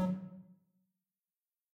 Just some more synthesised bleeps and beeps by me.
beep, bleep, buzz, computer, sci-fi